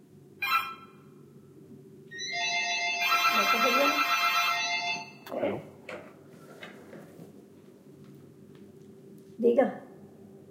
20060910.phone.ring
a telephone rings, is picked up, and a female voice says hello in Spanish. Soundman OKM>Sony MD>iRiver H120
phone, ring, pickup